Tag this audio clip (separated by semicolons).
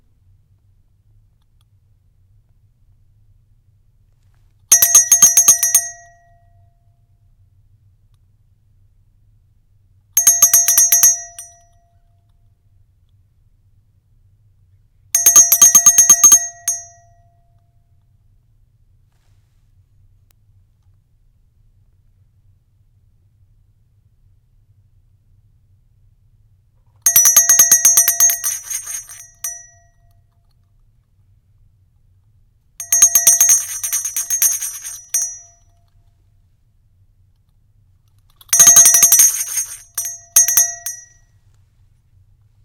bell; ding; handbell